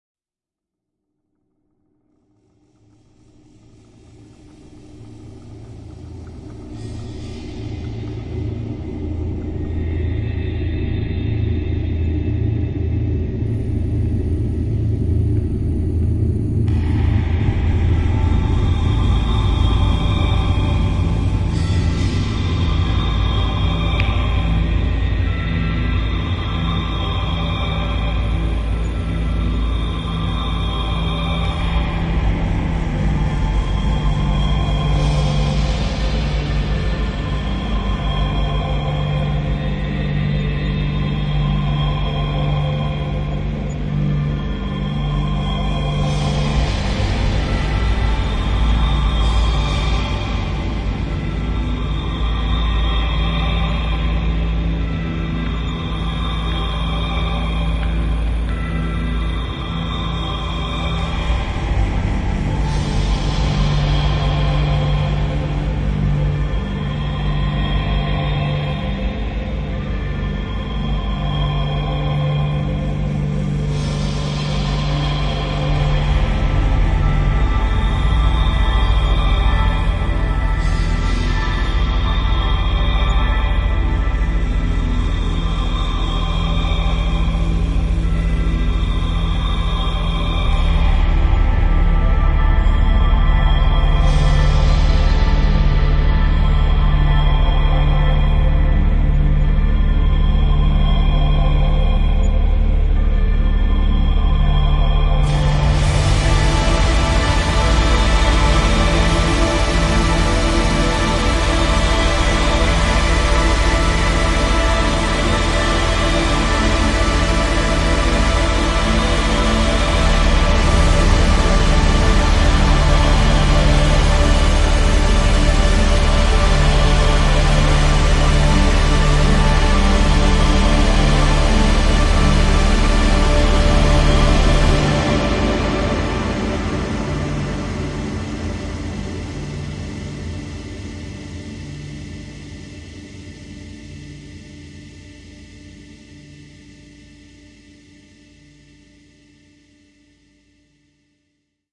space ambient v02
An actual new production instead of old stuff! Made in June of 2020.
A dark intro implies the humming engines of the spaceship you're in as you're traveling at speeds unimaginable. Slowly the ship starts to spring to life. As you open your eyes, slow but huge bands of light sweeps across your cryogenic sleeping pod, as if being scanned. The pod starts to move while you're still in it, going through chambers filled with machinery and bleeping computer stations, until it stops in front of a huge closed door. Suddenly, your pod opens up as well as the door. Your eyes are greeted with the majestic sight of a gigantic control room with windows as far as the eyes can see, galaxies fills your field of view, a beautiful synergetic view of the grandeur of space and the hundreds of lights of the control room, welcoming you to a new adventure.
2 simple chords form the basis of a textural composition supplied with sound effects.
ambient, majestic